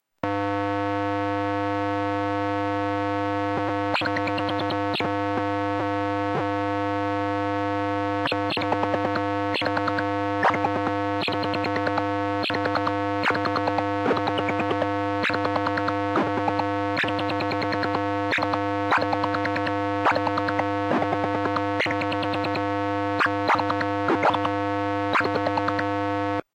Colorino light probe, old Sanyo TV remote
Pointing the Colorino and the remote for my 21-year-old Sanyo CRT color TV at each other and pressing different buttons on the remote. It has no trouble detecting the infrared light. Changing the angle just slightly makes a difference in the tone. They must be pointed right at each other at fairly close range.
The Colorino Talking Color Identifier and Light Probe produces a tone when you hold down the light probe button. It's a pocket sized 2-in-1 unit, which is a Color Identifier/Light Detector for the blind and colorblind. The stronger the light source, the higher the pitch. The more light it receives, the higher the pitch. So you can vary the pitch by moving and turning it.
Recorded from line-in on my desktop using Goldwave. Low-pass filter was applied to lock out the 16khz sampling frequency.
color-detector, modulated-light, sanyo, electronic, frequency-modulation, Assistive-technology, playing, blind, experimental, infrared, accessibility, color-blind, tack-tack-tack, light-probe, modulation, fm, noise, remote-control, code, tv-remote, digital, light-to-sound, tone